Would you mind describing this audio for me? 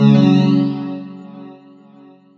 game button ui menu click option select switch interface